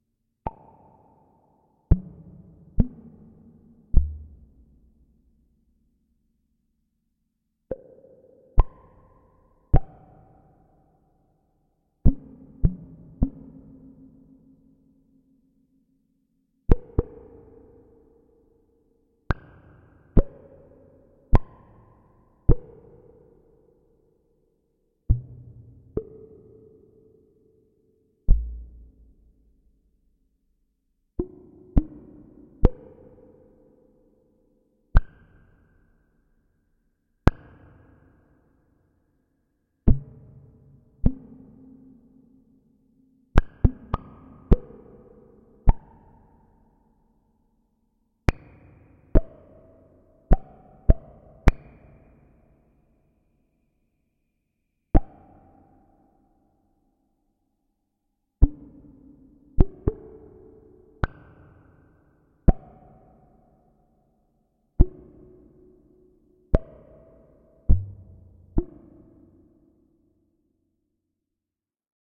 The Doepfer A-108 VCF8 is pinged via a short gate form the Korg SQ-1.
I used the BP output and added spring reverb.
Recorded using a Zoom H5 and processed using ocenaudio.